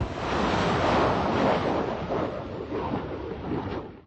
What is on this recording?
mim-23 hawk missile launch 2 less echo
Specific details can be red in the metadata of the file.